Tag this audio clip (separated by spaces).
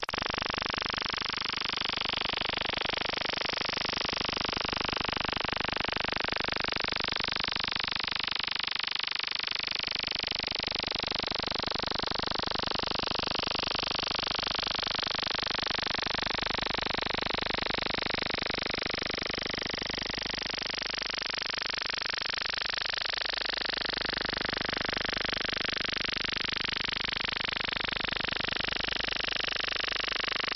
radio circuit FM bending media Broadcast lo-fi noise Sound-Effects